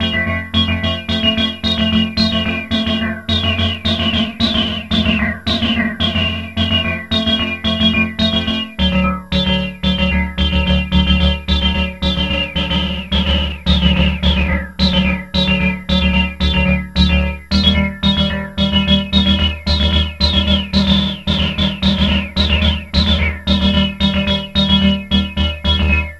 a freehanded introplay on the yamaha an1-x.
an1-x, freehand, played, syntheline, yamaha